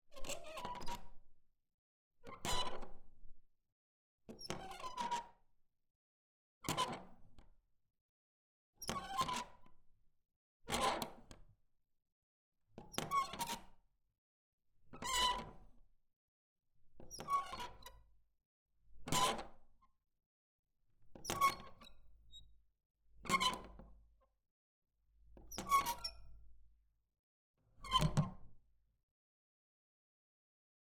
Squeaky Metal Door Bolt Twist 192 Mono
A small, creaky metallic bolt for a residential door
metal, creepy, squeak, squeaky, metallic, creaky, creak, horror